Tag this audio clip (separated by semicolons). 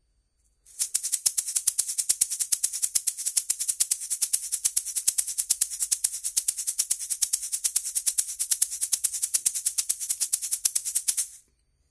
brazil; groove; percussion; samba; shaker; shaker-egg